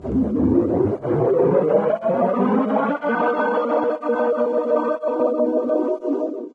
Mangled snippet from my "ME 1974" sound. Processed with cool edit 96. Some gliding pitch shifts, paste mixes, reversing, flanging, 3d echos, filtering.